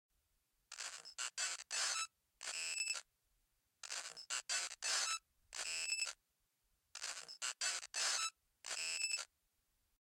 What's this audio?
Broken Computer 1
broken computer noise
computer-noise, broken